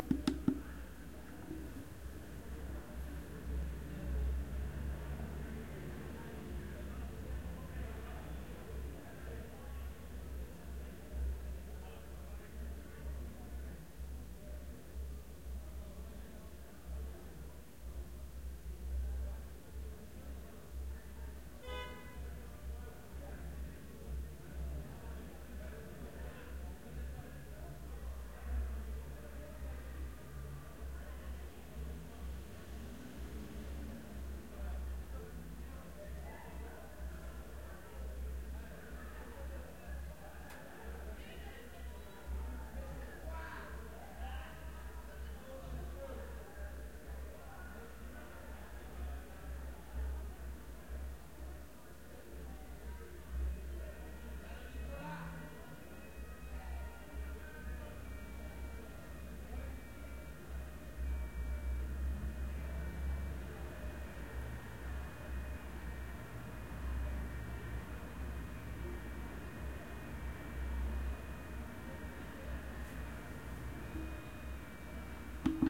Crowd young people through window
I recorded with the Zoom H4n from inside hotel in Geneva at 2 o'clock in the morning.
From far there is an ambulance be heard. Young people having party outside club in the street.
ambiance, ambience, ambient, Club, crowd, field-recording, Geneva, laughter, outside, party, people, street, talking, young